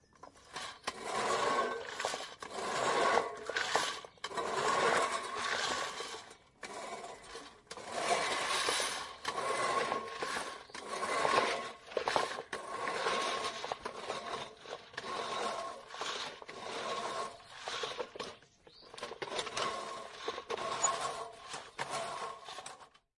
Sound of a cylinder mower... a finch in the background.
Recorded on a Zoom H2N (MS microphones).
cylinder, cylinder-mower, lawn-mower, mower